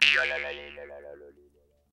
jaw harp17
Jaw harp sound
Recorded using an SM58, Tascam US-1641 and Logic Pro